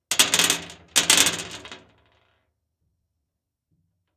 throwing pebbles onto metal06
Contact mic on a large metal storage box. Dropping handfuls of pebbles onto the box.